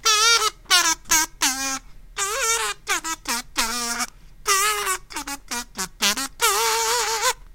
Master Kazoo player Cartoon Kevin improvising in front of a cheap Radio Shack clipon condenser.